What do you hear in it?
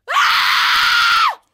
A scream of a girl for a terror movie.
666moviescream, frighten, scream, shout